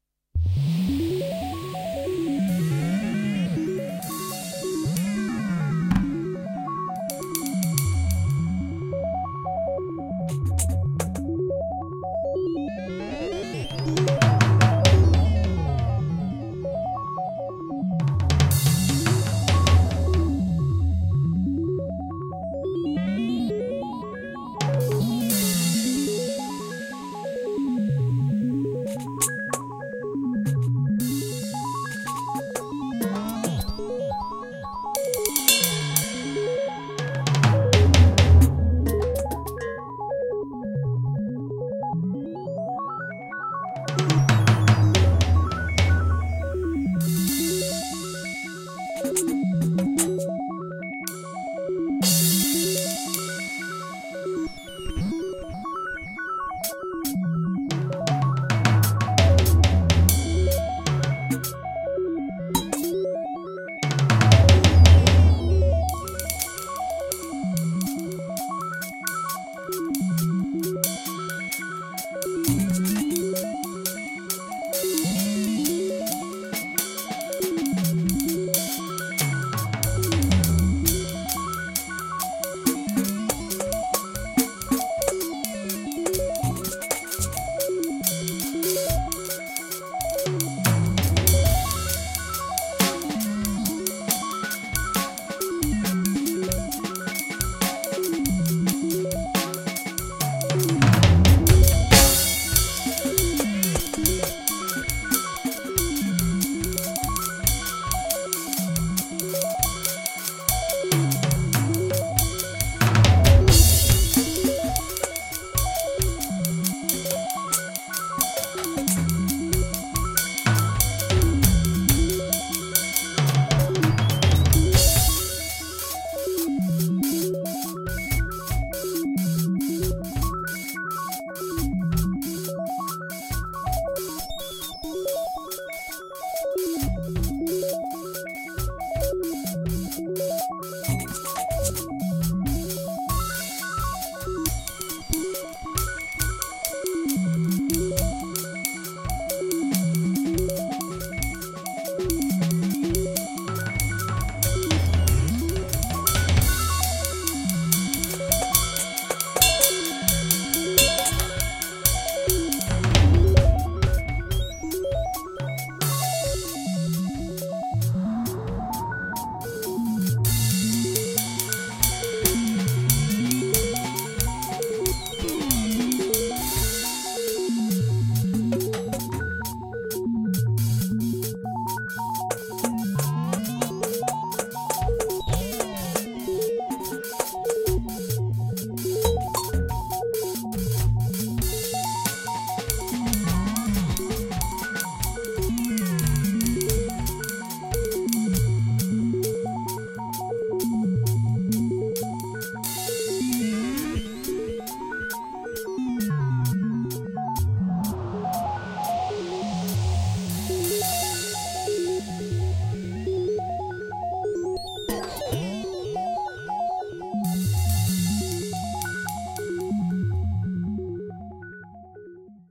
Some haunted drumming somewhere in space.
Made with Roland V-drums, Roland Handsonic and microKorg, recorded with WavePad.